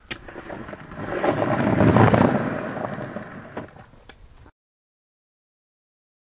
Skateboarder rolling along pavement